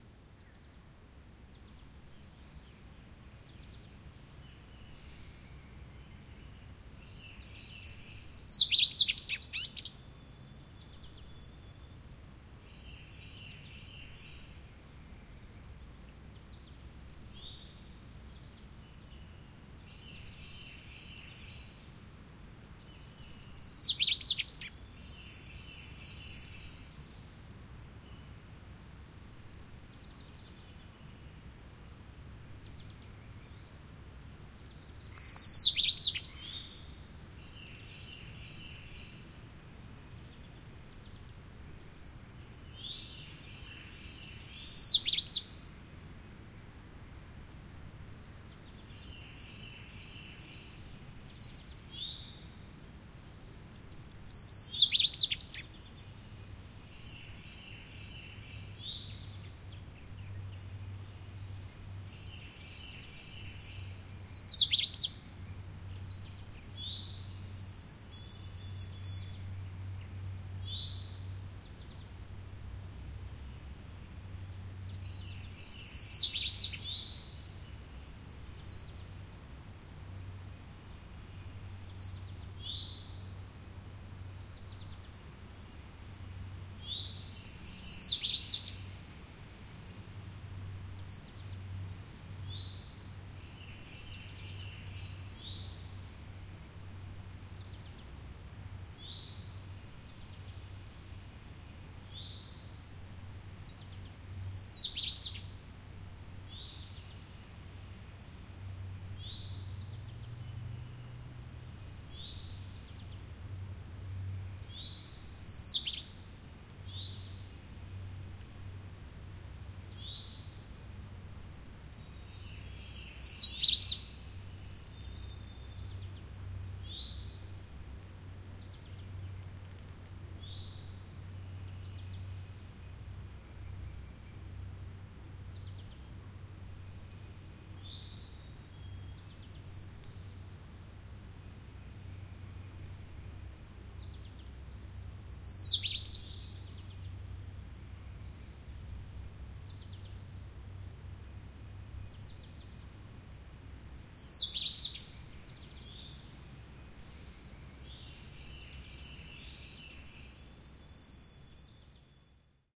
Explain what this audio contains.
A nice spring soundscape of birds in deep woods. I set my recorder on a sand-bar in the middle of a nice-sized creek, turned on the internal mics on my Zoom H4N and just let nature do the talking.
Recorded around 11 in the morning on a cloudy 71 degree day.